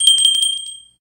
smallest brass bell- short ringing
ding, ting, jingle, ringing, brass, bell, ring